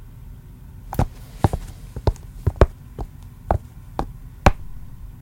walking footsteps flat shoes tile floor 7
A woman walking in flat shoes (flats) on tile floor. Made with my hands inside shoes in my basement.
female, flat, floor, footsteps, shoes, tile, walking